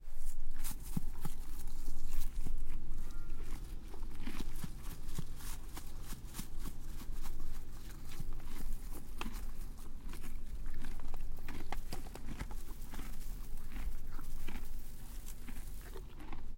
Horses Eating Grass Hay 02
I recorded a horse eating grass and smacking it's lips to grab more grass.
eating, Grass, Horse